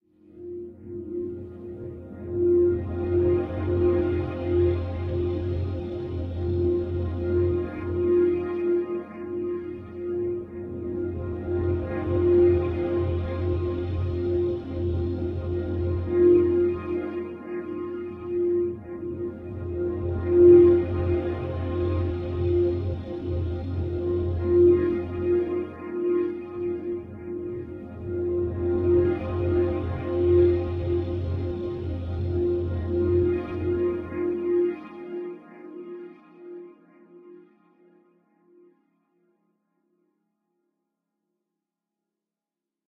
Ambient Atmos Space Pad
Sampled a previous track I created. Looped 2 sections, added fx etc... Enjoy!
ambient, atmos, atmosphere, background, loop, pad